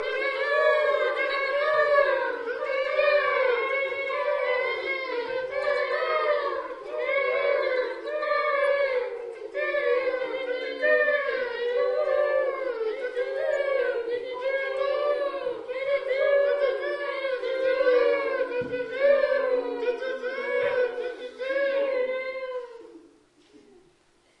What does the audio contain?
A recording of Kittiwake calls made at St Abbs Head, Scotland